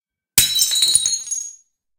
The unmistakable sound of a plate breaking on concrete